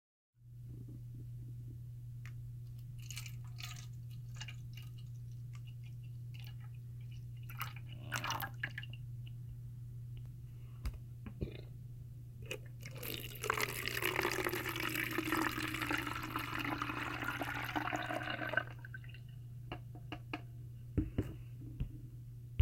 water being poured out of and into a bottle
water, liquid, trickle